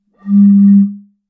Bottle blown 01
Some sounds of blowing across the top of a glass bottle.
Specifically a 33cl cider bottle.:-)
Captured using a Rode NT5 small-diaphragm condenser microphone and a Zoom H5 recorder.
Basic editing in ocenaudio, also applied some slight de-reverberation.
I intend to record a proper version later on, including different articulations at various pitches. But that may take a while.
In the meanwhile these samples might be useful for some sound design.
One more thing.
It's always nice to hear back from you.
What projects did you use these sounds for?
air, columns, blown, samples, one-shot, glass, bottle, tone, resonance, blow, 33cl, closed-end, sample-pack, resonant, recording, building-block, blowing